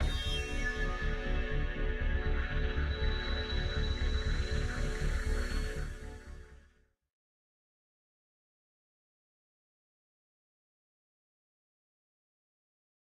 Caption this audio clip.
synth1 Renderedfinal
a mix of xphraze, fm7 NI, pluggo passed into a convolution re verb
dreamz, synth